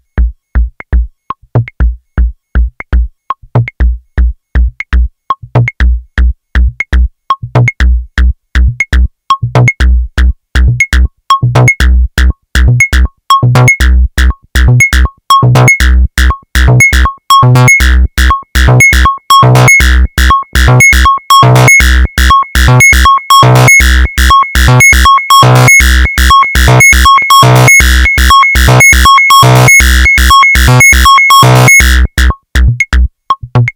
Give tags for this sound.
Modular Synth W0 Analog Mungo

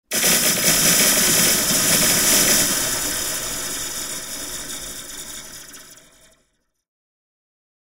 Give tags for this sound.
game-design rpg attack role-playing-game magician spell witch spellcaster magic game wizard chaos

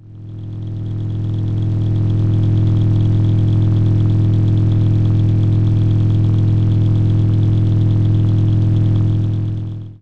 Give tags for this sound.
ground pitchshift

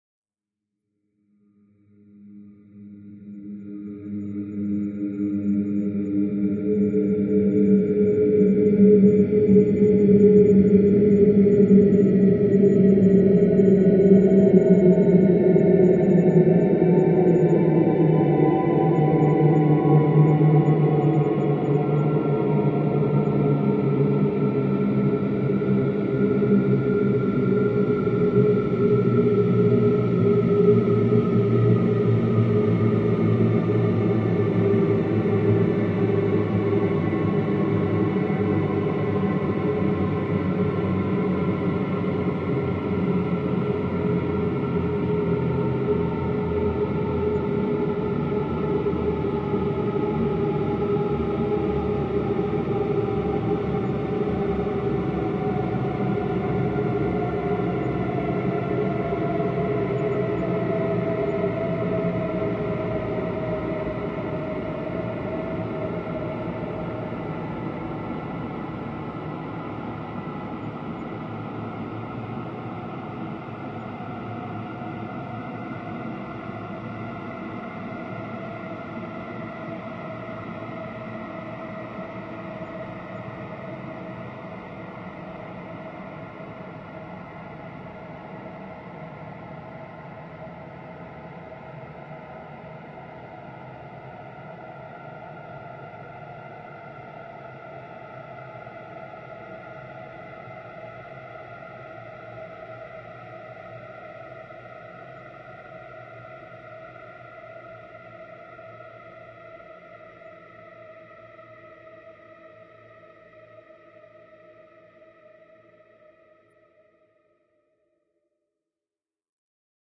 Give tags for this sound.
ambient atmosphere drone

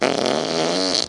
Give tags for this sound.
stereo flatulence field-recording fart

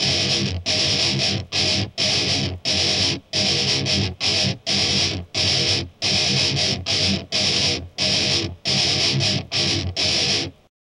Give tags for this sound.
180bpm groove guitar hardcore heavy loops metal rock rythem rythum thrash

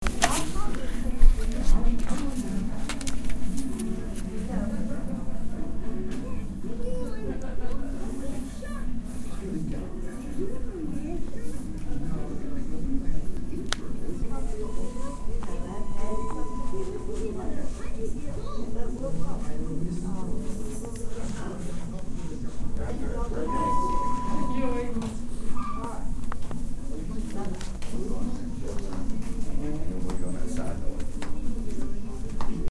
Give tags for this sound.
chatter clipboard ding dinging elevator hospital paper papers radio ring ringing rustling tv wait waiting waiting-room

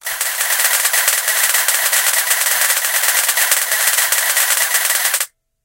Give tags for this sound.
celeb Mass media news people shutter TV

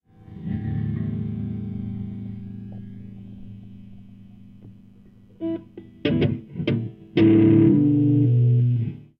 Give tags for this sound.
guitar plugging-in

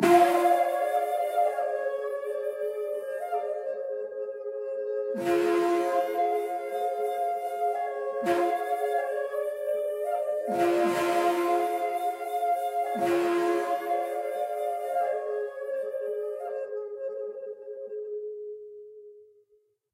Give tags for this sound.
shakuhachi stretching transformation

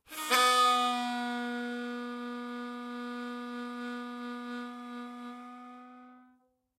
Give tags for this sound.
a key

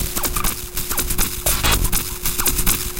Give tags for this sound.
digital glitch random

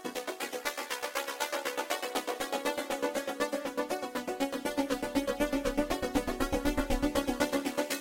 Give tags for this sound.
120bpm loop music pad rhythmic sequence synth

